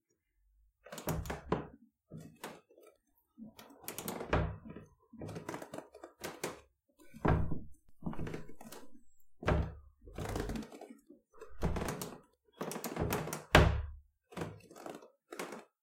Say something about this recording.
A collection of creaking wood sounds.
Creak, Haunted, Wood